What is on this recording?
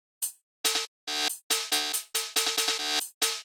techno percusion loop
glitch, loop, percusion, snare, techno